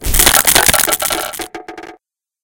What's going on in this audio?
Smashing a Wooden Container
This is for a video game I was making, but I figured it would be a good idea to share this with everyone.
This is supposed to be the sound of a wooden container being violently smashed open, such as a barrel or a box, or even possibly a wooden wall.
I borrowed a few sounds from the site, tweaked a little of course, and also added some powerful white noise effects to indicate a hard smash. With some layering with twigs cracking and pieces of wood falling on the floor, I think it sounds pretty authentic... or as authentic as it can get!